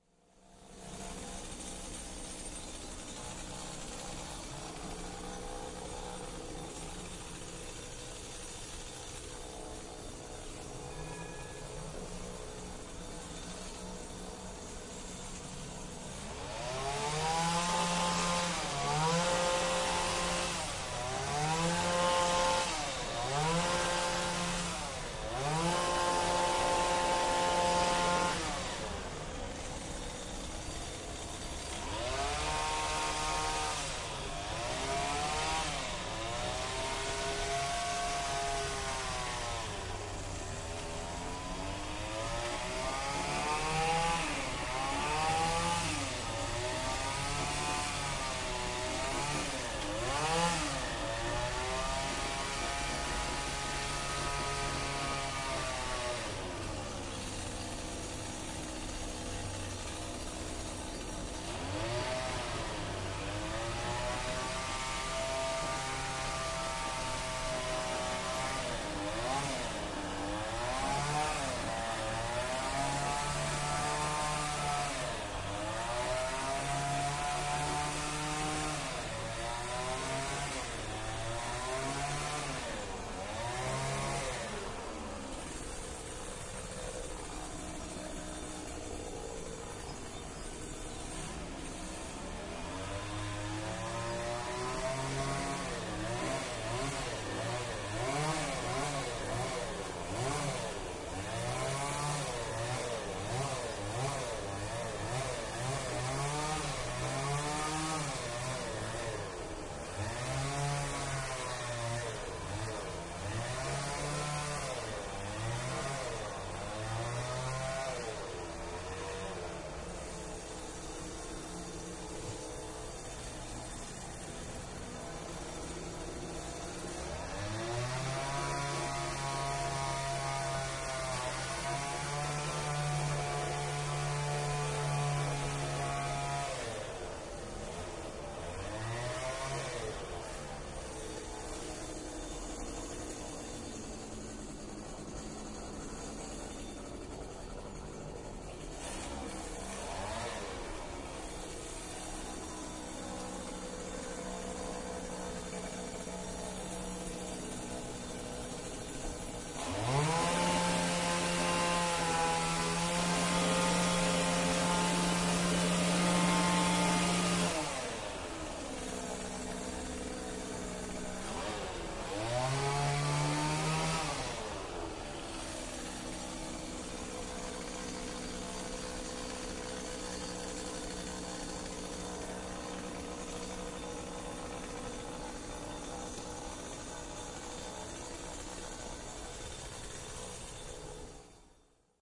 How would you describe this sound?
Gardeners cutting the hedges in the neighbourhood with motorized hedge-shears at 8:10 hr pm on the third of October 2006. You also hear the bell of streetcar number 10 leaving its starting point. Recorded with an Edirol-R09.